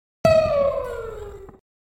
bew gunshot 1
A stylized laser gun firing sound effect.
Recording Credit (Last Name): Frontera
laser, synth, lazer, beam, sci-fi, pluck, gun, alien